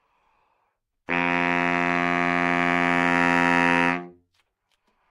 Sax Baritone - D3 - bad-dynamics bad-timbre
Part of the Good-sounds dataset of monophonic instrumental sounds.
instrument::sax_baritone
note::D
octave::3
midi note::38
good-sounds-id::5397
Intentionally played as an example of bad-dynamics bad-timbre
baritone, D3, good-sounds, multisample, neumann-U87, sax, single-note